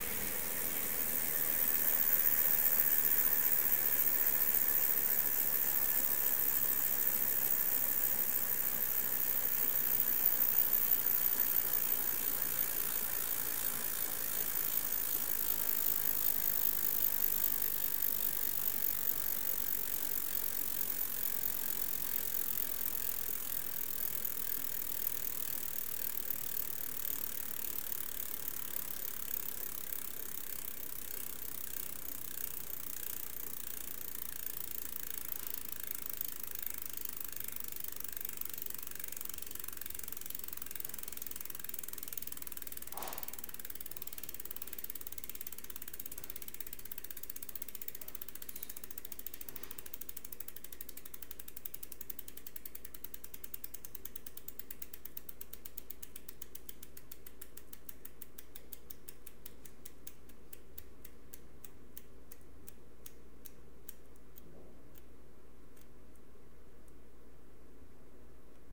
old bicycle "merida" recorded at home, arm-pedaling
gears, pedaling, cycle, wheel, bike, whirr, chain, bicycle, freewheel, click